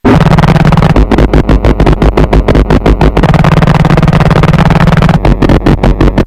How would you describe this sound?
This is a Casio SK-1 I did around a year ago or so From Reeds book plus a video out and 18 on board RCA jacks with another 25 PIN DPI that can run through a breakbox. Noise and Bent Sounds as Usual. Crashes ALOT. Oh and it's not the hardest "mother of bends" Serious, I wore socks and everything.
background, casio, circuit-bent, forground, glitch, if-your-crazy, lo-fi, noise, old, rca, scenedrop, school, sfx